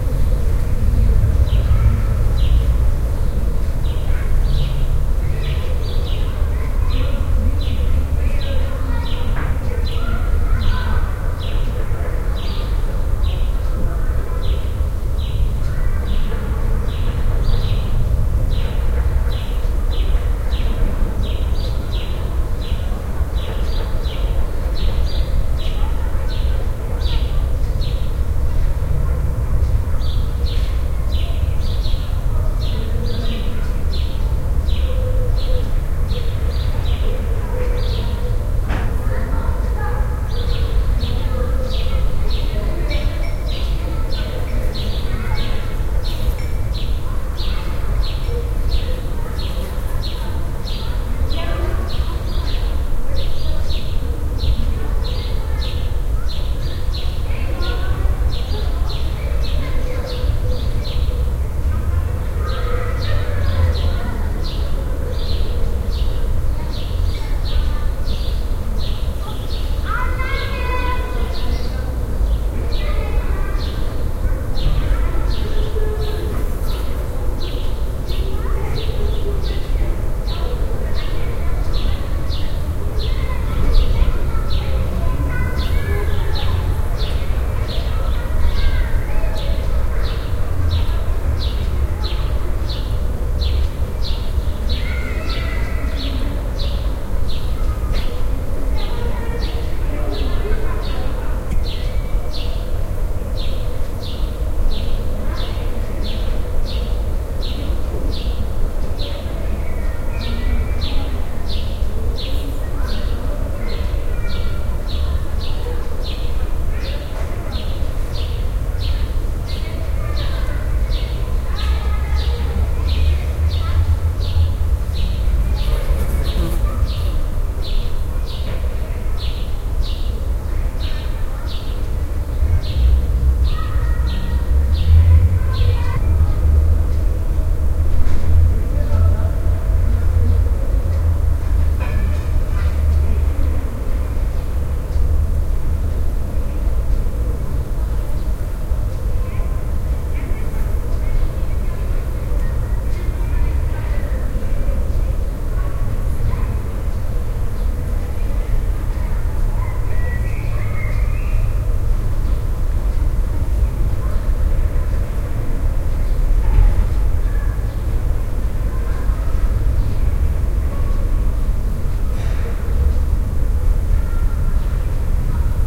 Atmosphere of a backyard in a central borough of Berlin. Great ambient noise of the city, sometimes a little bird is chirping.